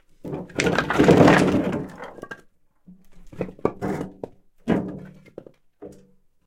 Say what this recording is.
Fuelwood Tipped
A stereo field-recording of dry Spruce logs being tipped from a wheelbarrow on to a concrete floor. Rode NT4 > FEL battery pre-amp > Zoom H2 line in.
field-recording, firewood, fuelwood, logs, spruce, stereo, tipped, xy